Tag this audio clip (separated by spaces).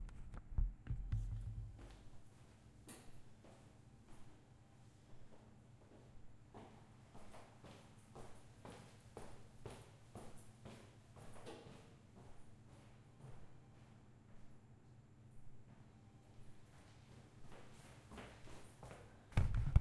metal halway walking passing